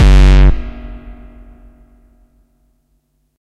Bass Horn Reverb LOUD!
Very loud bass horn sound